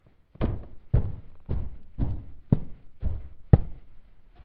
footsteps GOOD 2 B
Mono recording of feet (in boots) walking on plywood. No processing; this sound was designed as source material for another project.
floor, walking